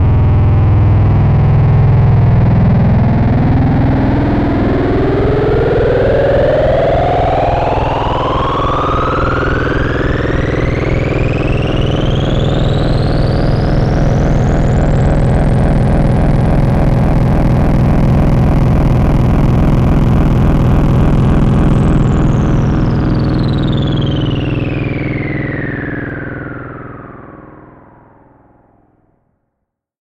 THE REAL VIRUS 01 - HARD FILTER SWEEP LEAD DISTOLANIA - E0
THE REAL VIRUS 01 - HARD FILTER SWEEP LEAD DISTOLANIA is a multisample created with my Access Virus TI, a fabulously sounding synth! Is is a hard distorted sound with a filter sweep. An excellent lead sound. Quite harsh, not for sensitive people. Enjoy!
harsh, hard, distorted, solo